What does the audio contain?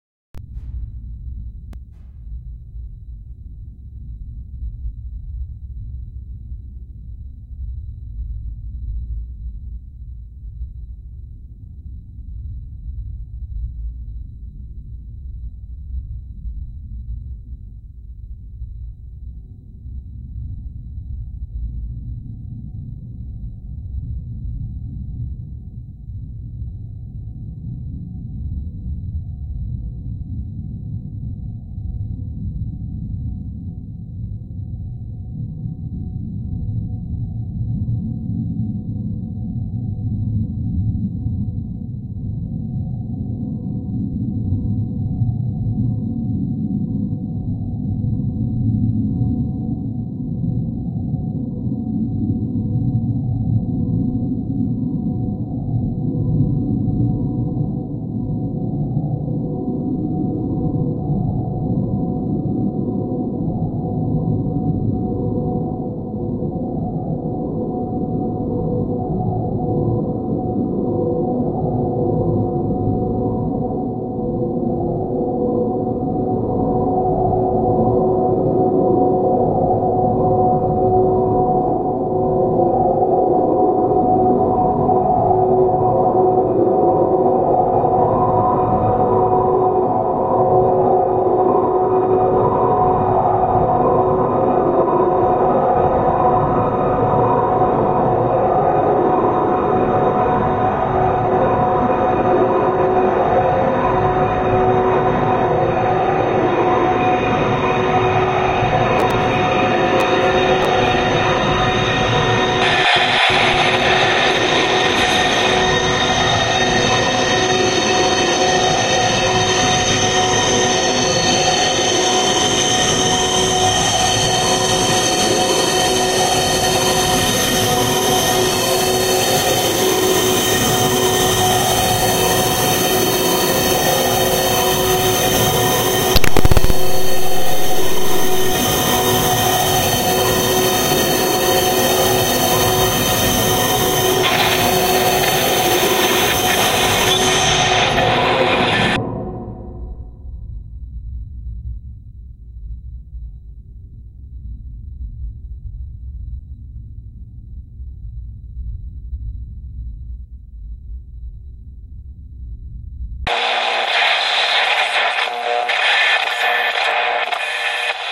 october the mexico SUN arrived to stay
future, radio, sounds, space, star, SUN, wave